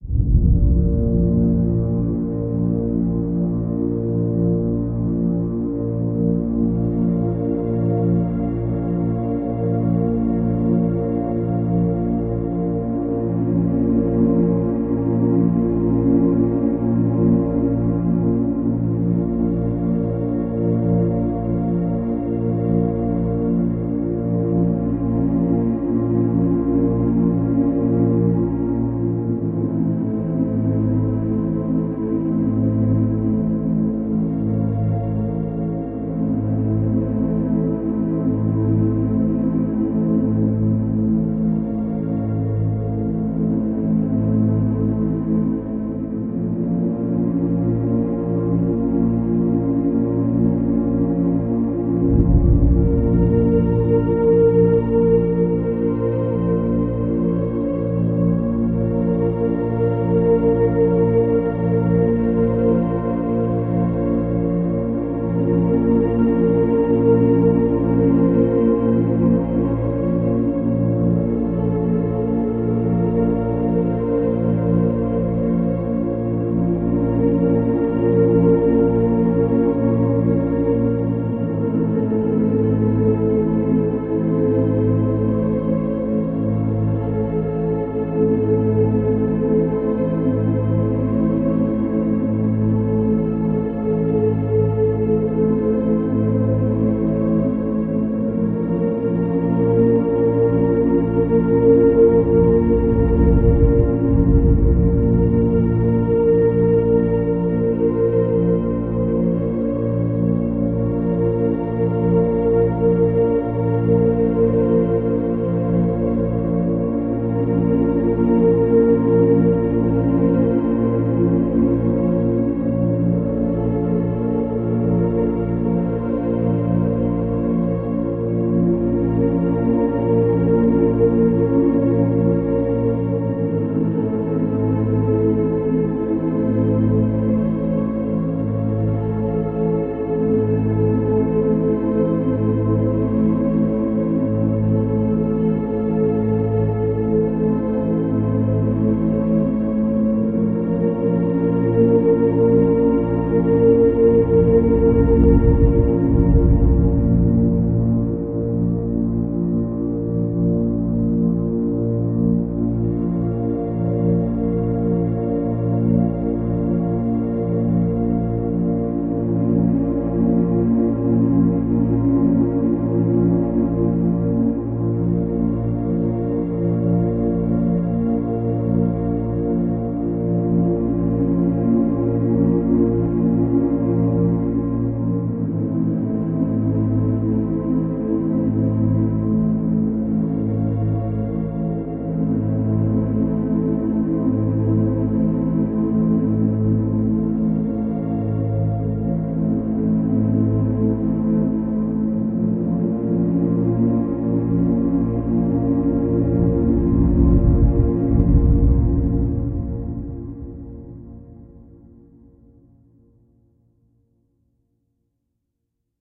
space music end of time cilp by kris klavenes 22.04.17
hope u like it did it on keyboard on ableton live :D
spooky, thrill, haunted, terror, black-hole, film, lost-in-space, game, end-of-time, sad-music, terrifying, creepy, space, dramatic